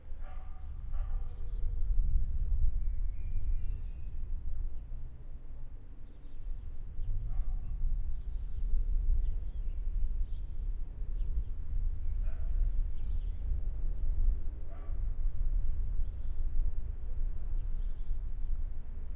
Queneau Dehors chien
chients qui aboient
animal, barking, dog